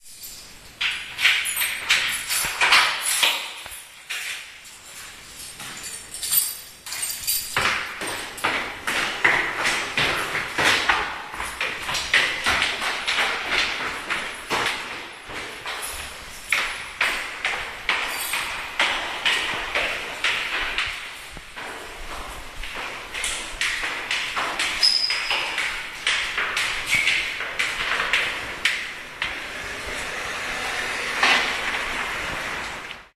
going out220810
22.08.2010: about 21.30. me and my friend are going out for a soundwalk.